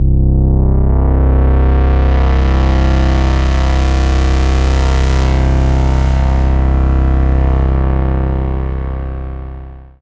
Badass Minimoog bass C4
Same as "Badass Minimoog bass C7" but C4 note is played